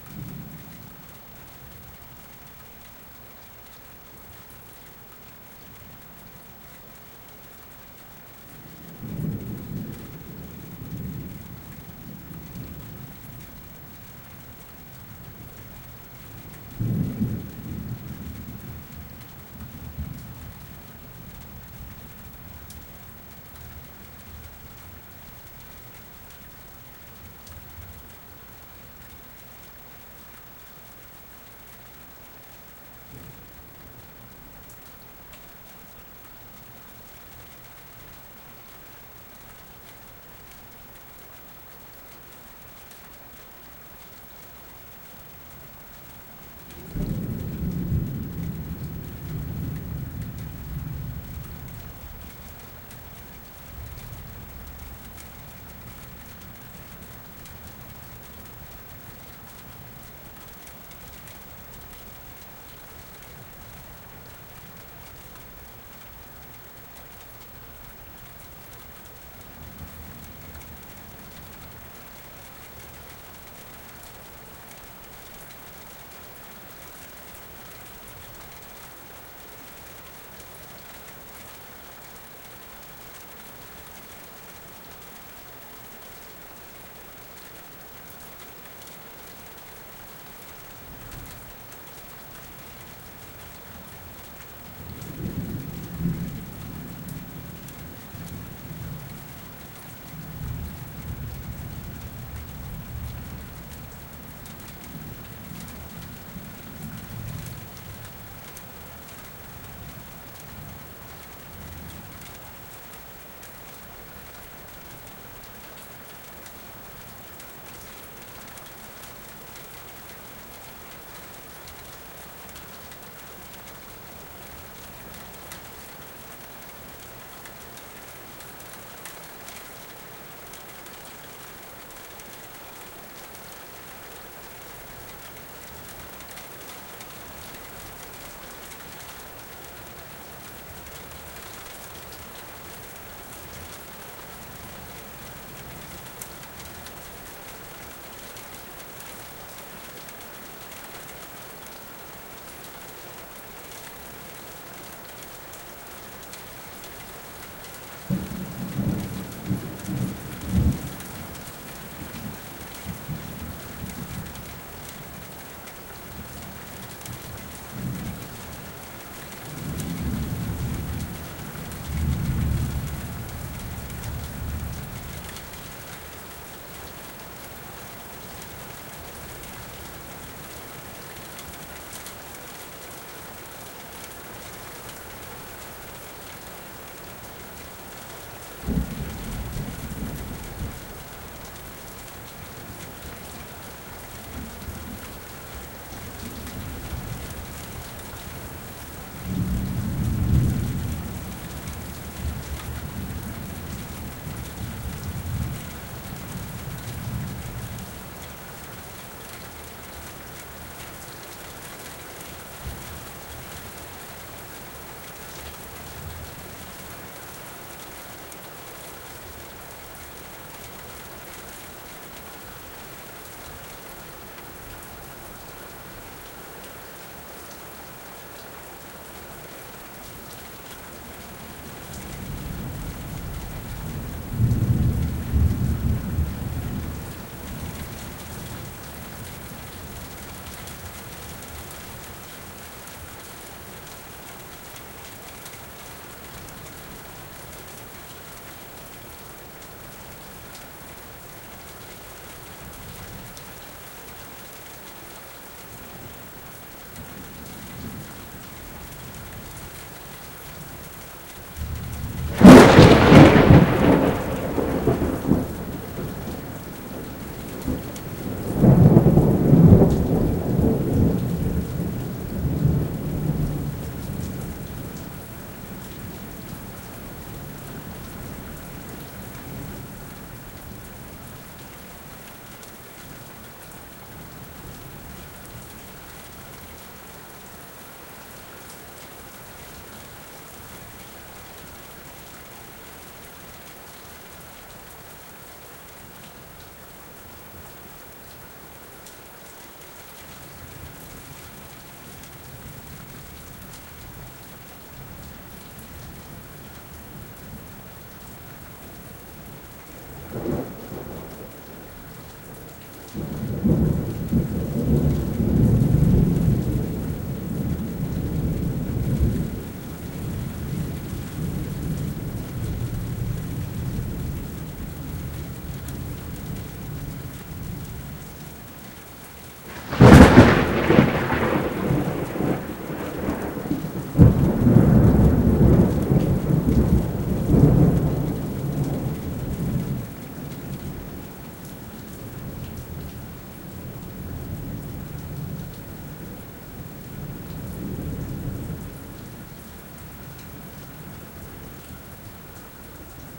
Thunder from 2nd floor balcony on laptap via usb mic.
thunder, storm, field-recording